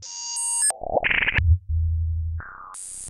bc8philter5
various bleeps, bloops, and crackles created with the chimera bc8 mini synth filtered through an alesis philtre
alesis-philtre, bleep, bloop, chimera-bc8, crackle, synth